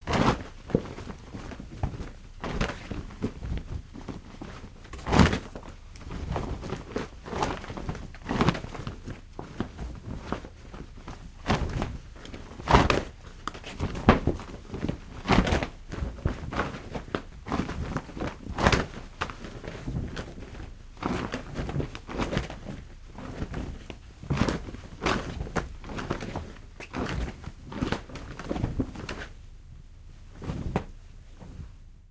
Cloth flapping on a clothesline thickly.
flap, cloth, sheet, flapping, clothing, fabric, tear, clothes, move, material, tearing, sheets, swish, textile, flag, movement